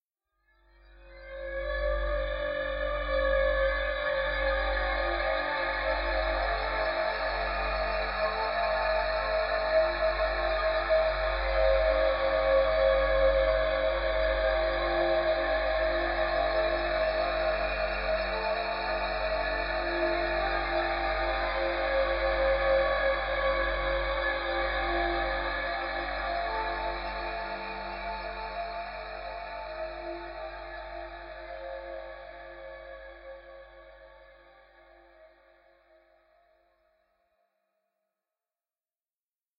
Perhaps useful as a harmonic layer on a bassy pad? Additive synthesis, reverb and unison.